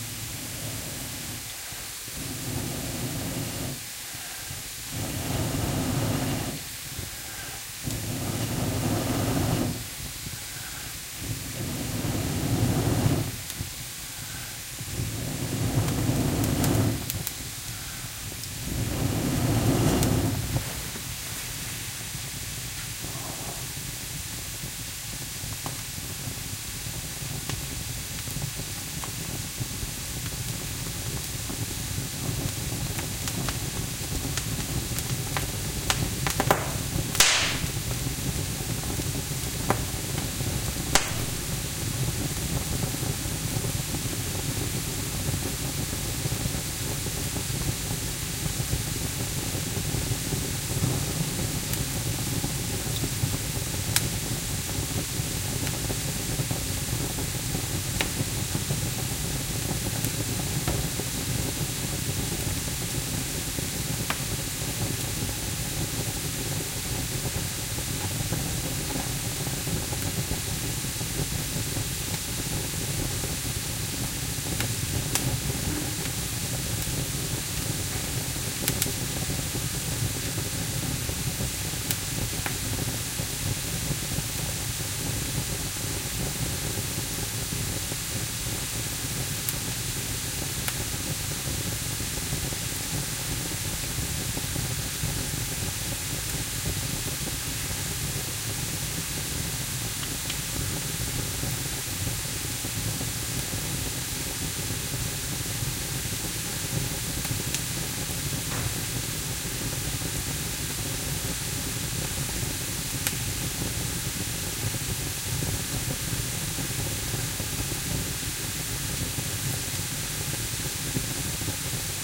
blowing into a fireplace with young Olive tree logs, sap boiling and crackling. Sennheiser MKH60 + MKh30 into Shure FP24 preamp, Olympus LS10 recorder